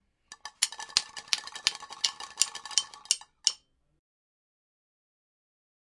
baldosa y vaso
un vaso con un lapiz y una valdosa siendo golpeada.
a plastic cup with a pensil and a tile being hit
lapiz, estudio, diseo, dmi, vaso, bldosa, cali, audio-technica, icesi, interactivos, medios, plastico